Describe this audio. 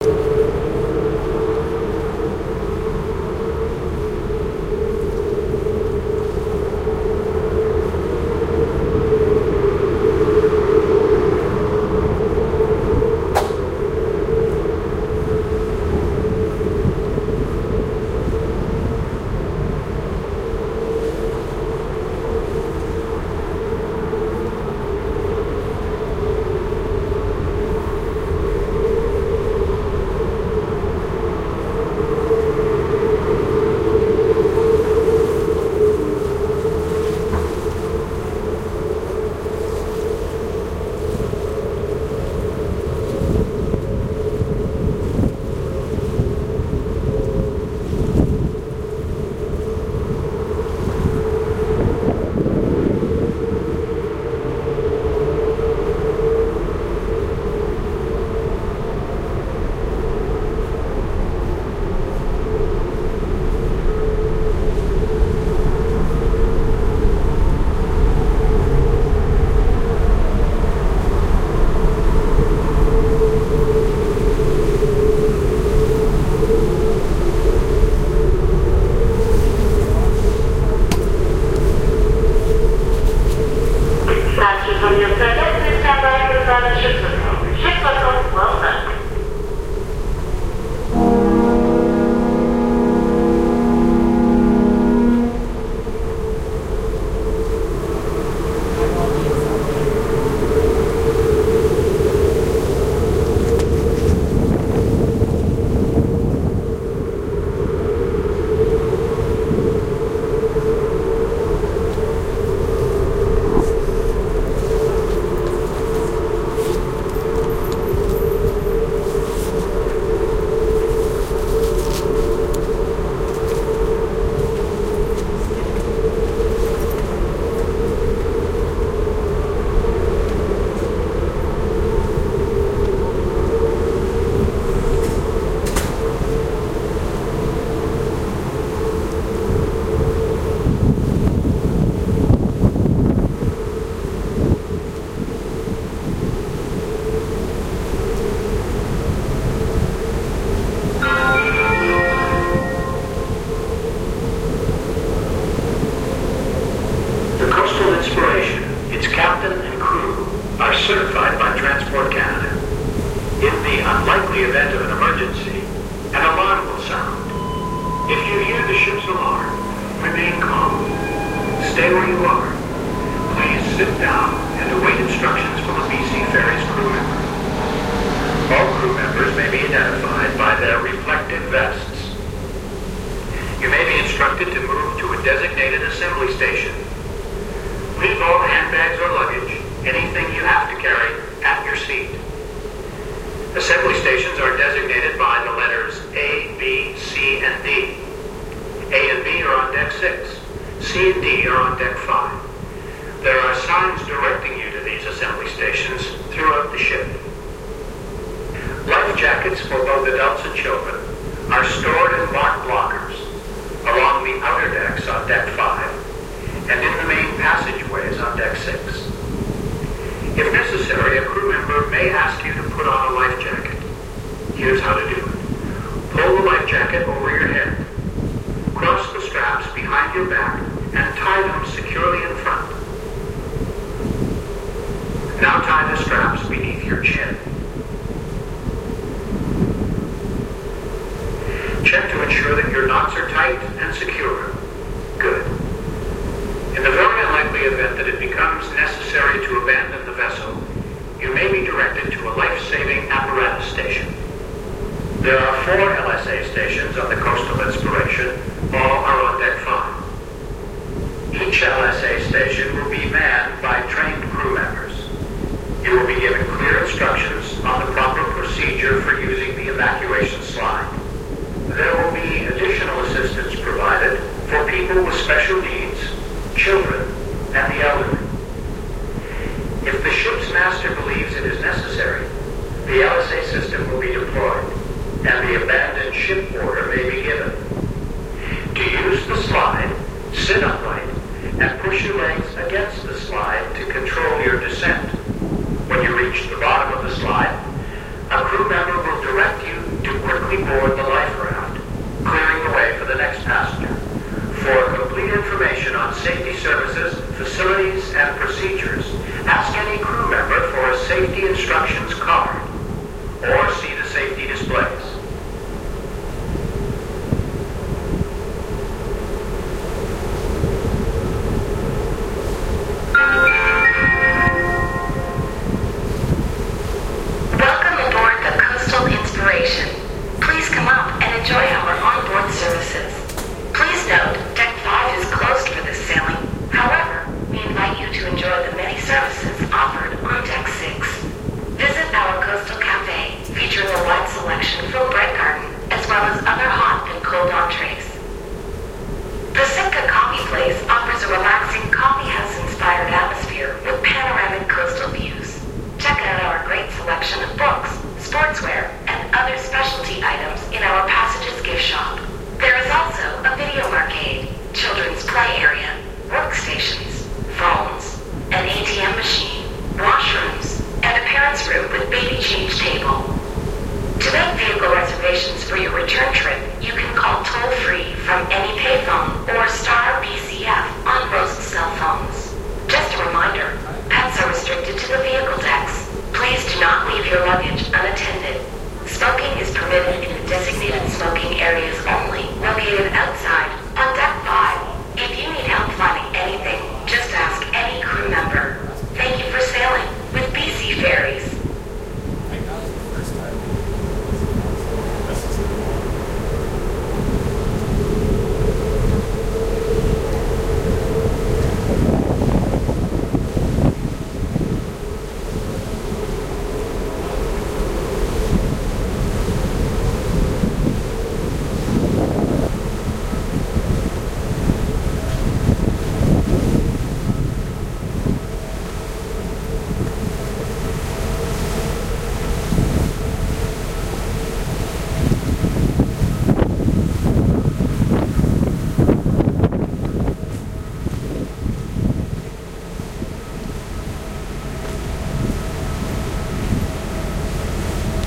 BC Ferries Ferry Horn + Announcements
I was on the ferry going back home and I wanted to record the ferries horn and the announcements. The ship blasts it's horn before leaving the dock and then does safety announcements afterwards. This is that recording. Enjoy.
Ambiance, Horn